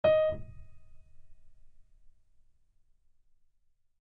acoustic piano tone